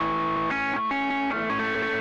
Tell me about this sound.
Randomly played, spliced and quantized guitar track.